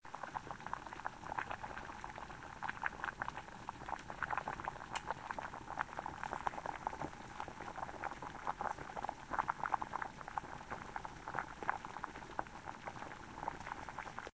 Boiling Pot of Water
A short recording of a pot of potatoes boiling. The cooking process was making fried potatoes.
Recorded by holding my phone over the pot, extracted audio from video.
Credit is nice but not required at all Enjoy!
food, fried, fry, frying, hot, kitchen, potato, stove, water